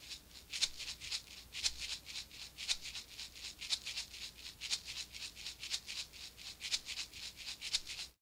Tape Shaker 11
Lo-fi tape samples at your disposal.
collab-2, Jordan-Mills, lo-fi, lofi, mojomills, shaker, tape, vintage